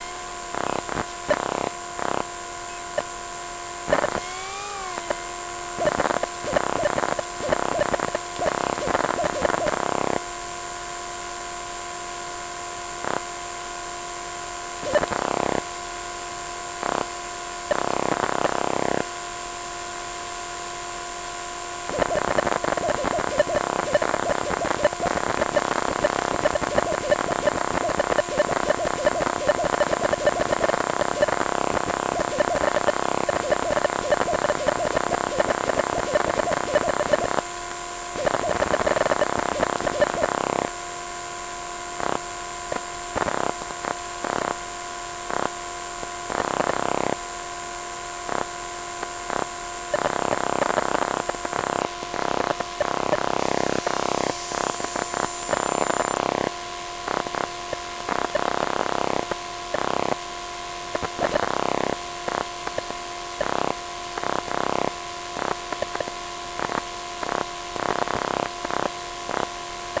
Recordings made with my Zoom H2 and a Maplin Telephone Coil Pick-Up around 2008-2009. Some recorded at home and some at Stansted Airport.
bleep, buzz, coil, electro, field-recording, magnetic, pickup, telephone